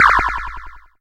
Retro, Underwater Shot
If you enjoyed the sound, please STAR, COMMENT, SPREAD THE WORD!🗣 It really helps!
attack, fire, firing, game, gun, retro, sea, shoot, shooting, shot, undersea, underwater, water, weapon